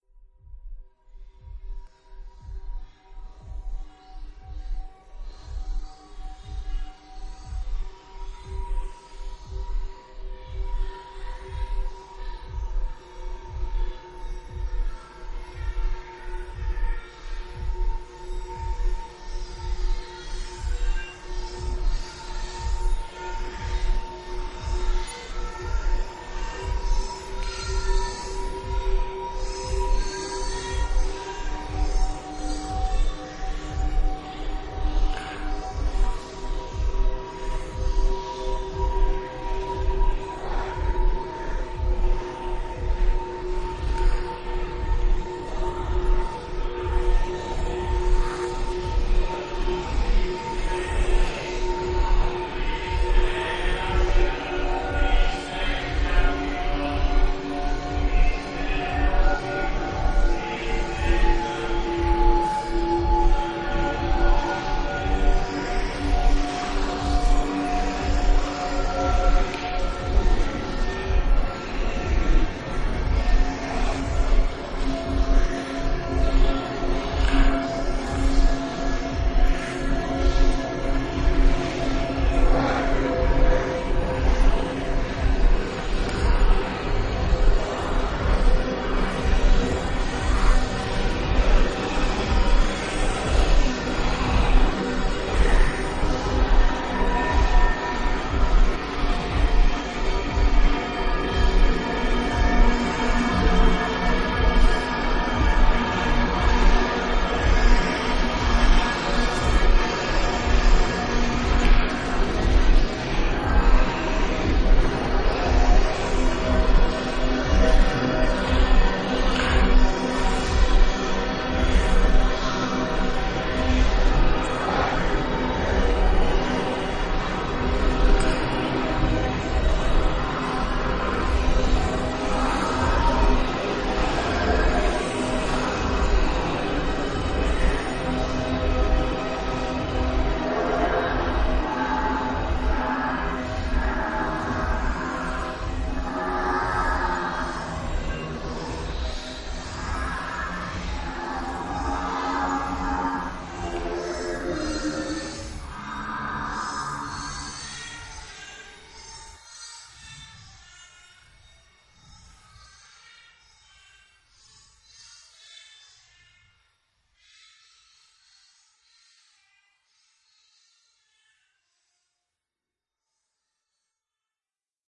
Halloween 2012 (Demon League) 2 0
ambient sounds for horror movie soundtrack
haunted place by setuniman_latin_litanies by cormi_heartbeat regular by zimm_monster, groans, grunts, slobber by jasonlon
satanic, scary, spooky